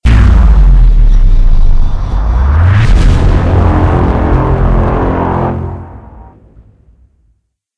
roper1911 heavy-beam-weapon-de-clicked
I downloaded roper1911's heavy beam weapon and loved it except for the fact that it had some clicks. I modified the waveform to removed the clicks. Now its smooth as silk. Enjoy Ropper1911's heavy beam weapon digitally remastered.
Asgard, base, bass, beam, blast, boom, cannon, french, gun, heavy, horn, inception, lazer, lazor, plasma, ropper1911, special, war, weapon, worlds